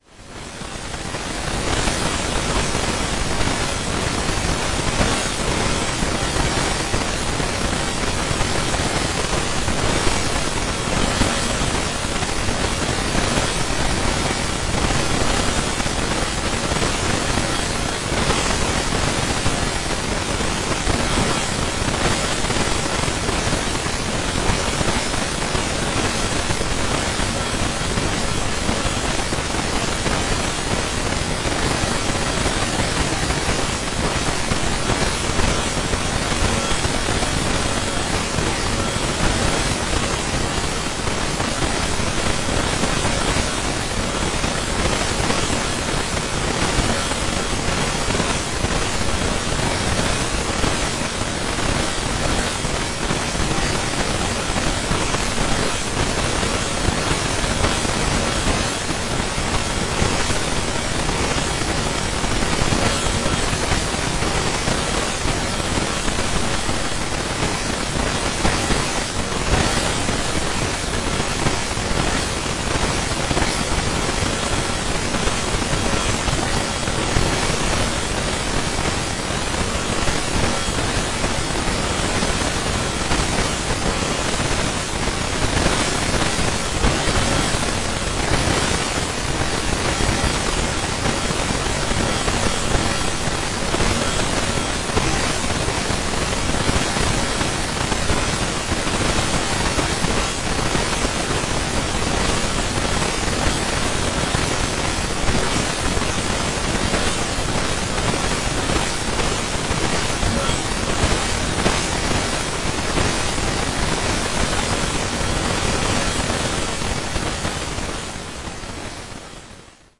Noise Garden 13
1.This sample is part of the "Noise Garden" sample pack. 2 minutes of pure ambient droning noisescape. Horror noise mess.
reaktor, drone, noise, soundscape, effect, electronic